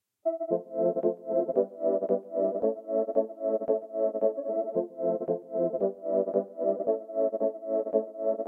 Created with a miniKorg for the Dutch Holly song Outlaw (Makin' the Scene)